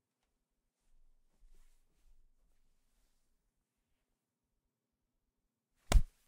Body Hits 3 03
Punching or smacking of a body
hit, impact, thud